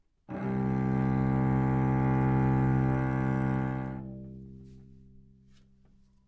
overall quality of single note - cello - C2
Part of the Good-sounds dataset of monophonic instrumental sounds.
instrument::cello
note::C
octave::2
midi note::24
good-sounds-id::1940
dynamic_level::mf
C2, cello, good-sounds, multisample, neumann-U87, single-note